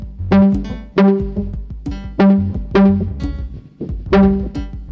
Quiet piano notes under louder percussive electronic beats loop
keyboard, percussive, piano